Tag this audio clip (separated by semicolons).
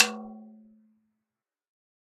drum; tom; 1-shot; multisample; velocity